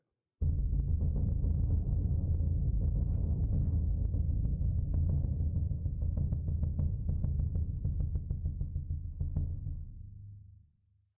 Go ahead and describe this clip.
Fast deep bass drum roll